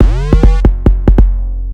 Created: 2015.09.
Software was used for creation: LMMS.
Base sample those shipped with LMMS was used.
Simply looped music theme that was used for daytime menu.